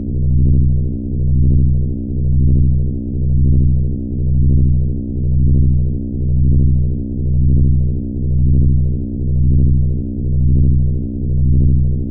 Electric background, where you can hear a very similar motor with electromagnetic noise interference.